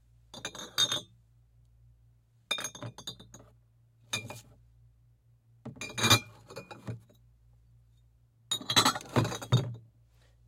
Box Up Bottles and Stuff FF394
Boxing up glass bottles. High pitched tinging of glass on glass contact, low rumble of sliding glass, glass rubbing glass. A few low pitch thumps of box movement.
Glass-bottles, Bottle-movement